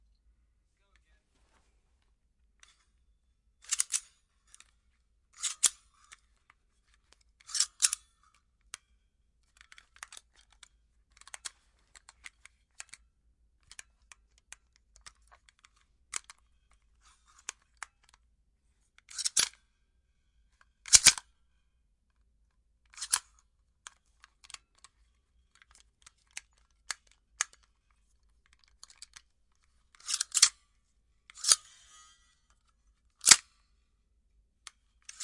Gun Sounds
Gun handling sounds made by a stapler
handle, gun, foley